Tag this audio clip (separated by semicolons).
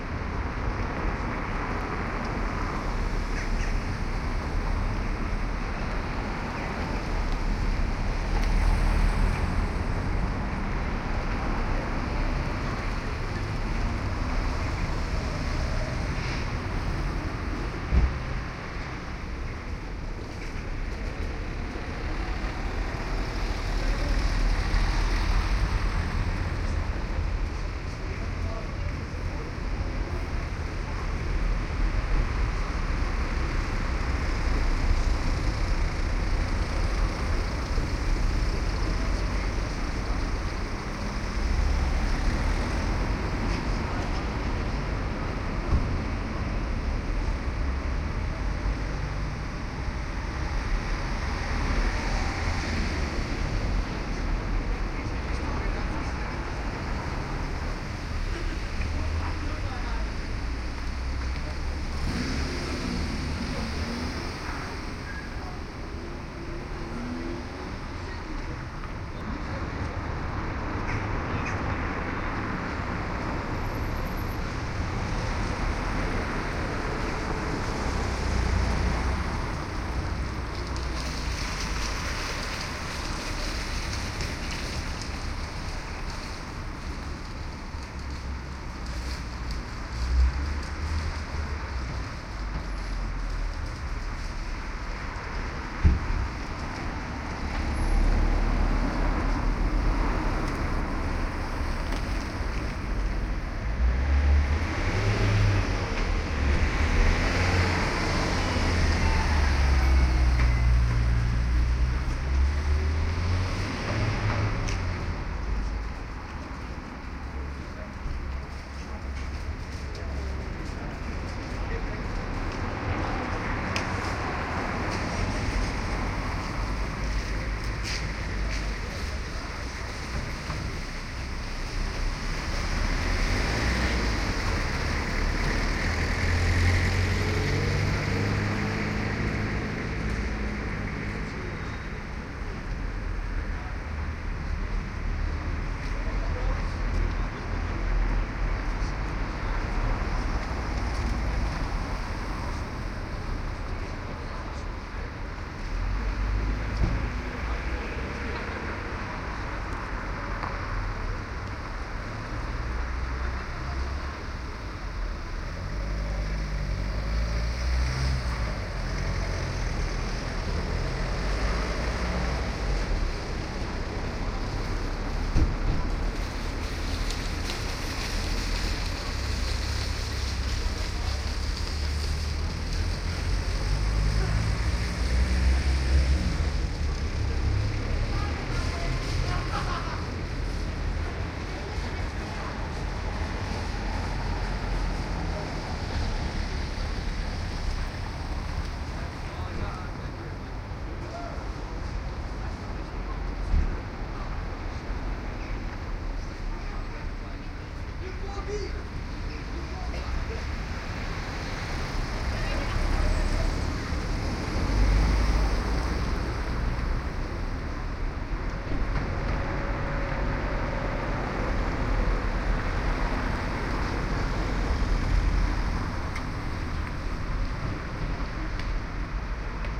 village; traffic; cars